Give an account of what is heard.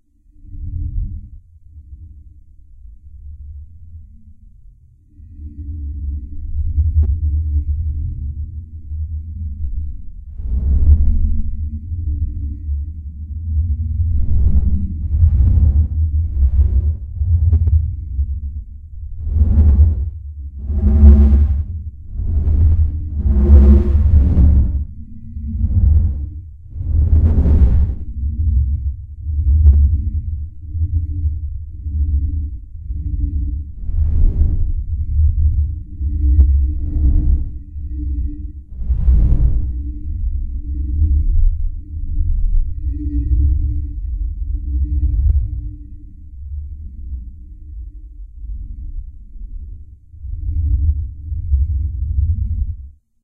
Two plates being scraped together by my webcam microphone, then I changed the pitch of the noise and played around with paulstretch in Audacity. Could be used for a horror or dark sci-fi setting.
Hey. I’ve moved my account.
Terror, Horror, Atmosphere, Scary, Evil, Halloween, Freaky